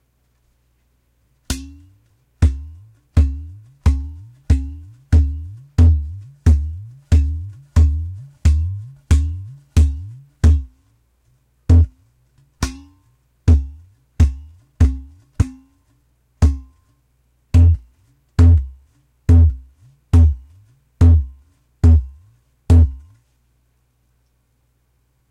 Seeing how low my udu udongo II can go